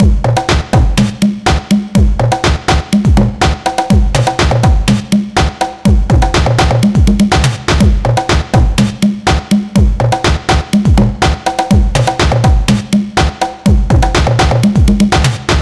Funk Bitxl op i1
Ableton live drum rack loop 123bpm
Funk, BigBeat, Drumloop, carioca, Drums, Loop, 123, Batidao, percussao